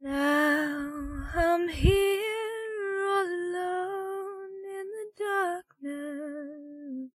The exact same as the other vocals (see its title for the lyrics) except cleaned WITHOUT reverb (by Erokia).
sing, female, clean, vocal, girl, non-reverb